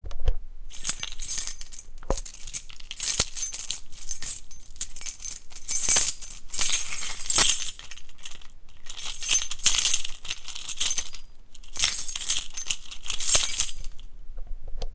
Car and house keys jingling.